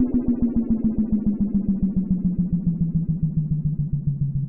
used intensively in the final chapter of "Ambienta" soundtrack! i'm proud enough of this sound that I have tried to design and re-produce for along time till obtaining a satisfactory result (i realized the square waveform was the key!!). it's a classic moog sweep you can ear in many many oldschool and contemporary tunes (LCD Soundsystem "Disco Infiltrator"; Luke Vibert "Homewerks"; Beck "Medley of Vultures" ..just to make a few examples). sound was bounced as a long sweep, then sliced as 6 separate perfectly loopable files to fit better mixes of different tempos: first 2 files is pitching up, pt 2 and 3 are pitching down, last 2 files are 2 tails pitching down. Hope you will enjoy and make some good use (if you do, please let me ear ;)